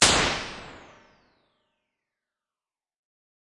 Alesis Microverb IR Large 2
Impulse response of a 1986 Alesis Microverb on the Large 2 setting.
Impulse, Reverb, Response, Digital, IR